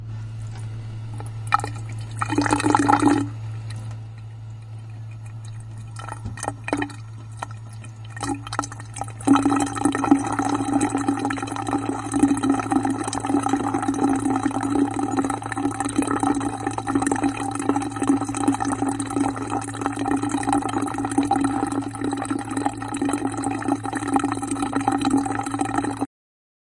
A water fountain with drain noise.